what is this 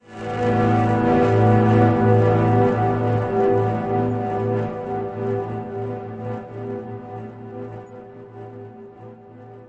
An acoustic guitar chord recorded through a set of guitar plugins for extra FUN!
This one is Am9.